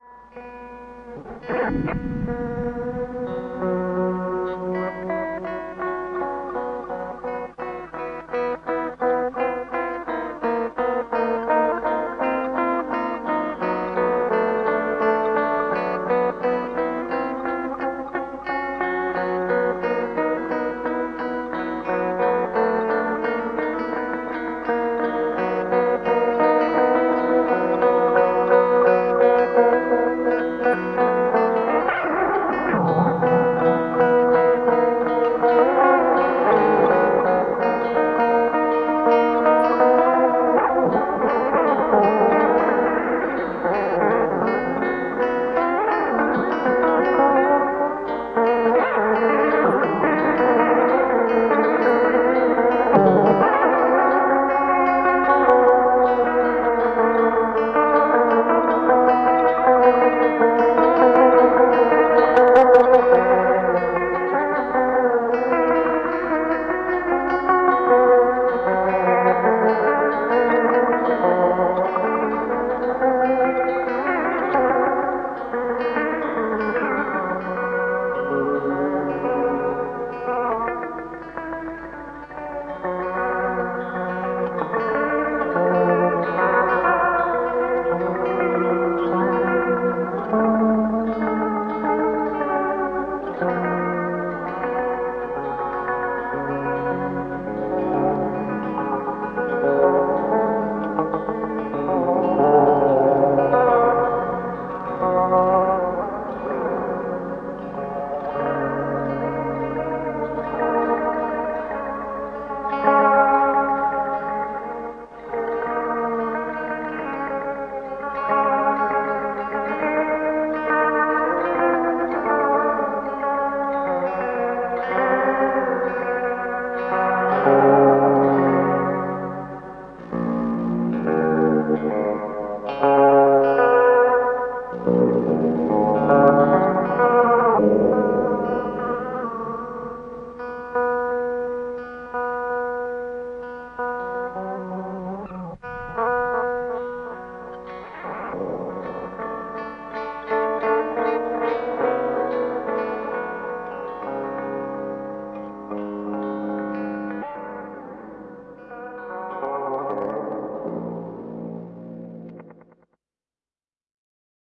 johnson warble
A guitar through defective self-modulating delay. The time potentiometer went "bad" after years of hard twisting and abuse, and now it warbles and pitch-shifts on it's own. It's very temperamental, though. The pedal is a Johnson "EAD-2 Analog Echo Delay". May be useful for an outro or something!
vibrato, warble, delay, guitar, modulate, defect